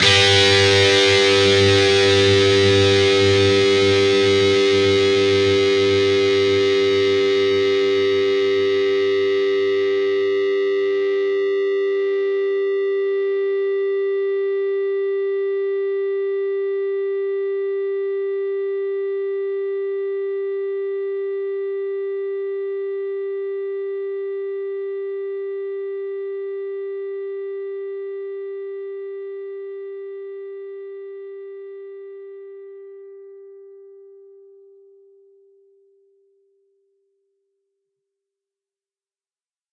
Dist Chr G&B strs 12th
Actually a Gmj 2 string chord. Fretted 12th fret on both the D (4th) string and the G (3rd) string. Down strum.
chords, distorted-guitar